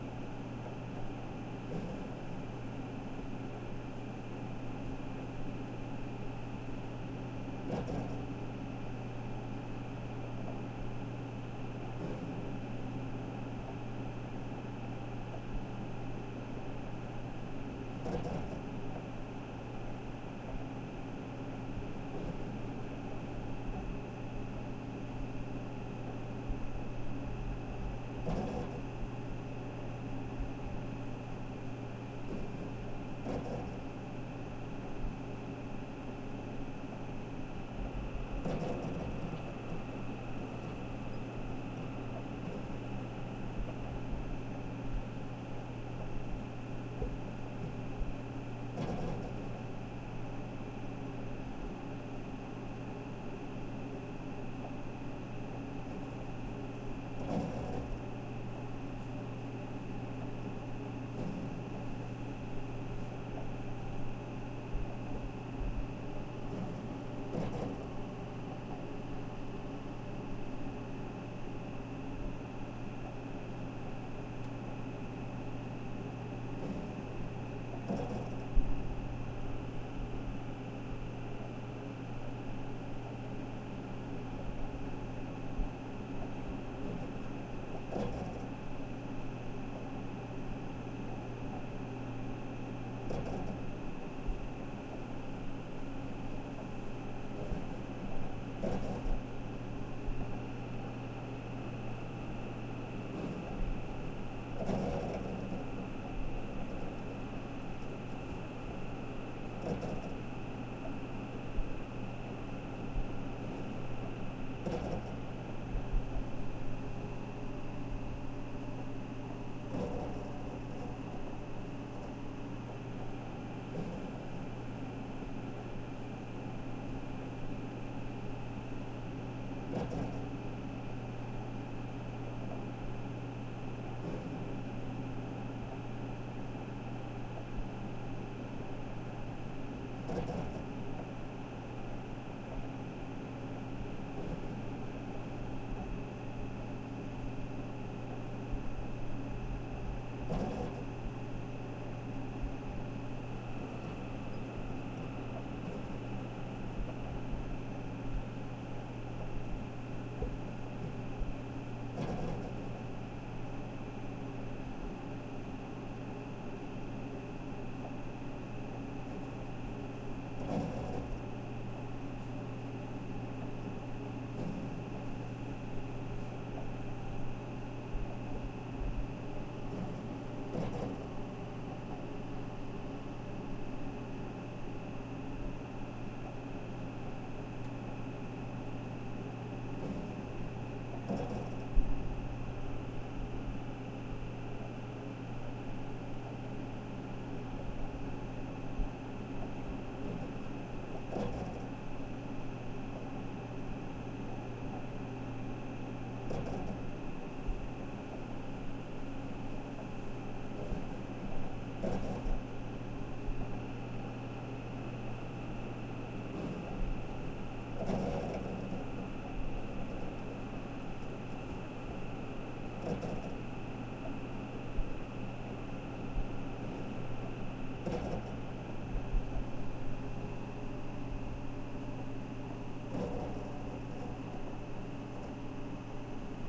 Server or computer room ambience: This ambience was recorded in a small room filled with computers and server boxes. Occasionally the scratching and whirring of a mechanical hard drive can be heard. This ambience is about 4 minutes long and is loop ready. This ambience was recorded with a ZOOM H6 recorder and a XY capsule mic. No post-processing was added to the sound. This sound was recorded by holding a ZOOM H6 recorder and mic very close to the loudest server in the room, while still allowing the polar pattern of the mic to capture the sounds of the other electronics and servers.
room-ambience, OWI, electronic-ambience, ambiences, computer-room-sounds, server-room-sounds